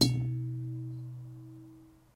Bassy metal object ding
ding, hit, metal, ring, ting, tone